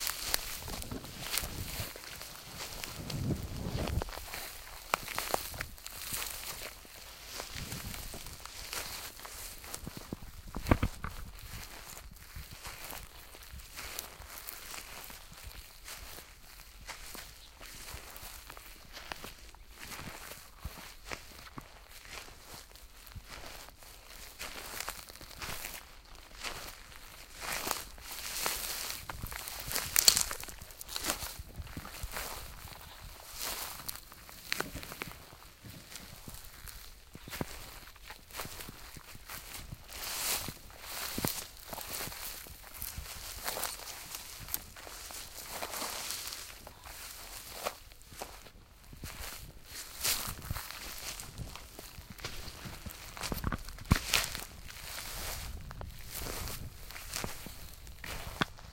Walking through grass
A person walks through dry grass in the countryside. Recorded with a Rode NTG mic and Zoom H6 recorder.
countryside, walking, field-recording, tall, crushing, rural, grass, feet, dry-grass